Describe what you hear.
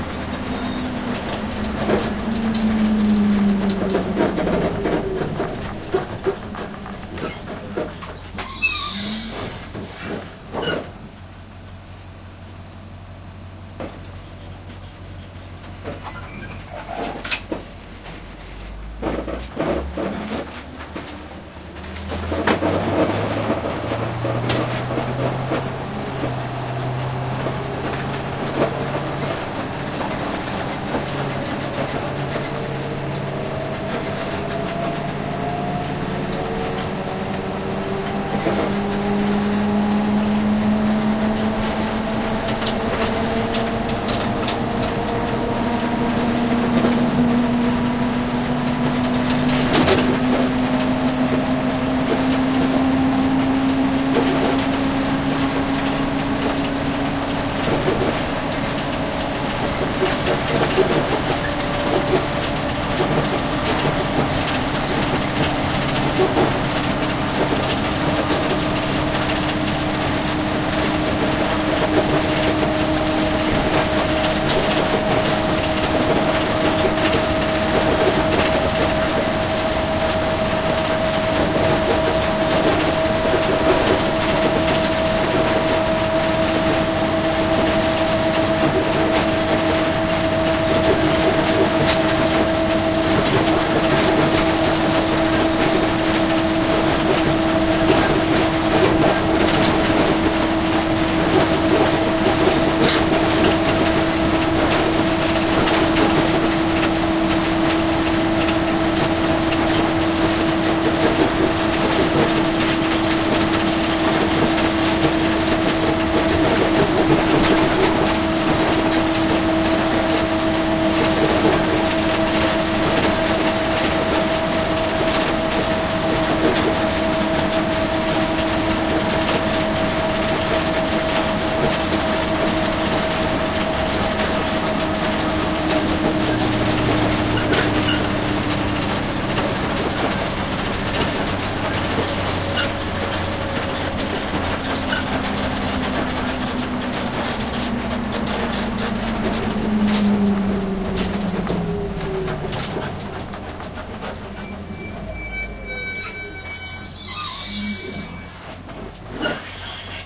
Recorded on an empty school bus with clanky disabled equipment nearby
ambience, truck